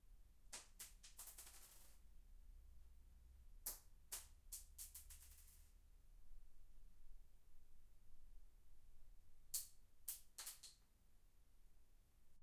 Shell casing ambient 2
Collection of 2 shell casings, recorded in a big room.